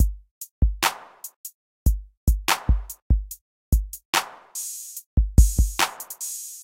On Rd loop 10
A bouncy 16 bar drum pattern made in FL studio 10s FPC plugin. I do not know yet or it is possible to mix loops made in FPC so these sounds are as they came in FL Studio 10
On-road, hip-hop, 145-bpm, 16-Bars, bounce, On-Rd